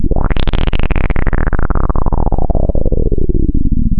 Multisamples created with subsynth. Eerie horror film sound in middle and higher registers.